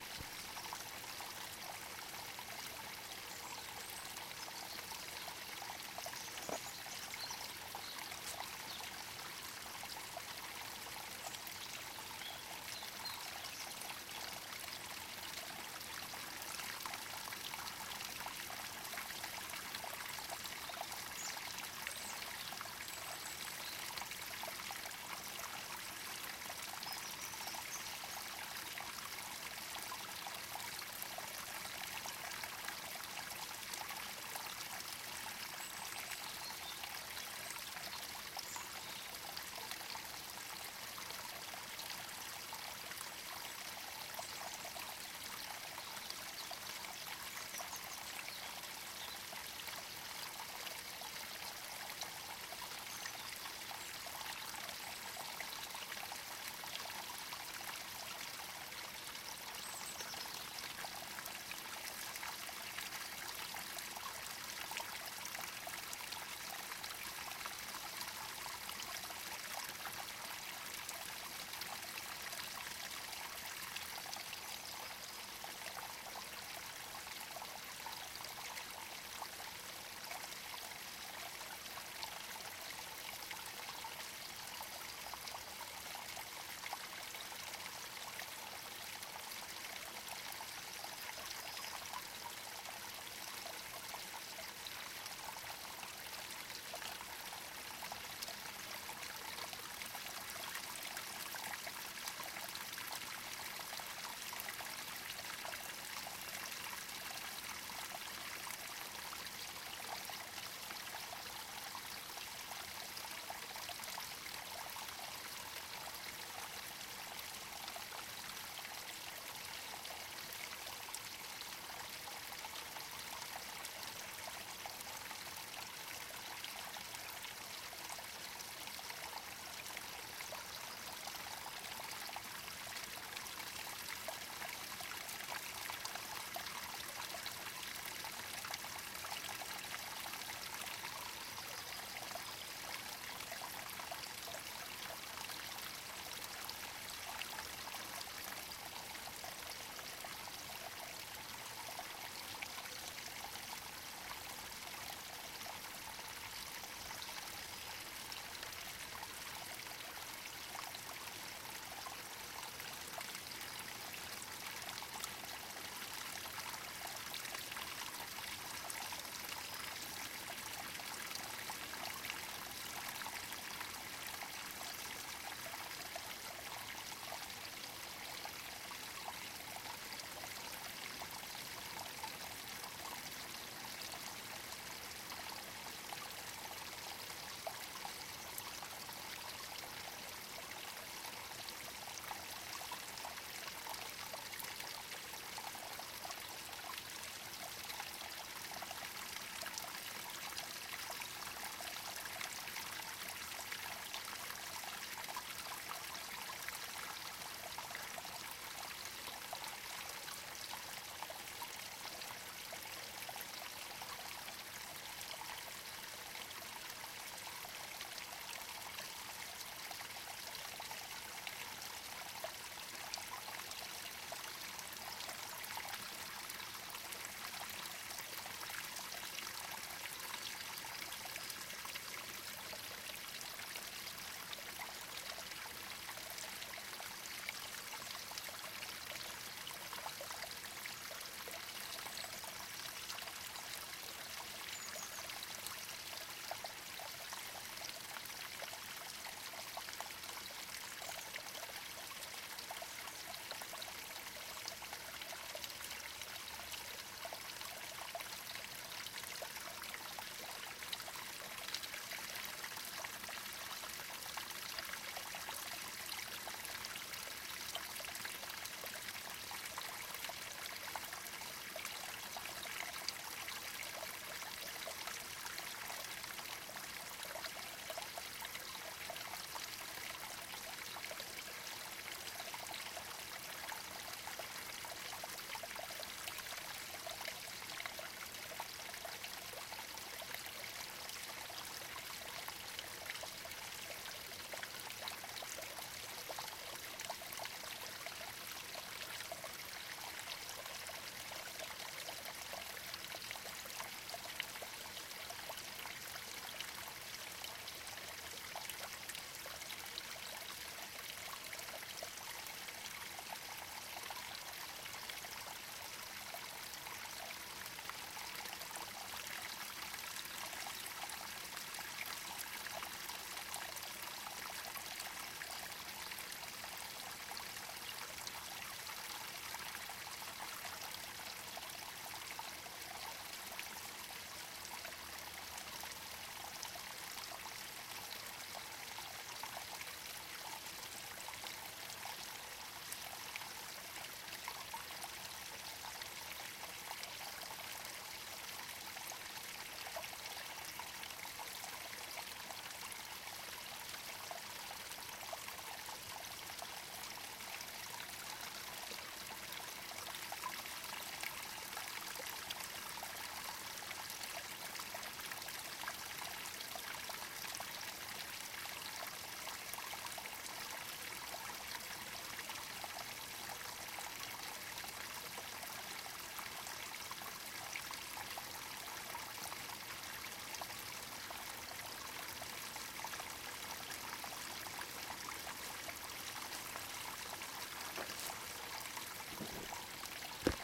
small-forest-stream-in-mountains-surround-sound-rear
small forest stream in the mountains
forest, mountains, small, stream